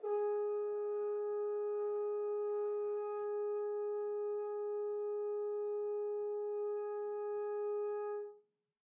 One-shot from Versilian Studios Chamber Orchestra 2: Community Edition sampling project.
Instrument family: Brass
Instrument: F Horn
Articulation: muted sustain
Note: G4
Midi note: 68
Midi velocity (center): 31
Microphone: 2x Rode NT1-A spaced pair, 1 AT Pro 37 overhead, 1 sE2200aII close
Performer: M. Oprean
vsco-2, muted-sustain, midi-note-68, single-note, brass, f-horn, multisample, midi-velocity-31, g4